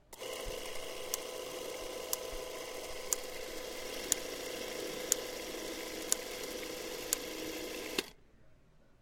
Running a Bolex H16 movie camera. The clicks signify that 1 foot has run through the camera. This camera was produced from 1936 until 1947.